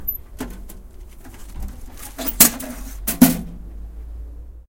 Letter box
mail, letter, mailbox, letter-box, post, transport, newspaper